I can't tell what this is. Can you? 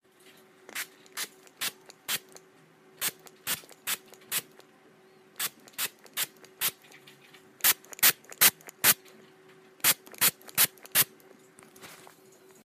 Spray Bottle
The sound of a spray-bottle spritzing water.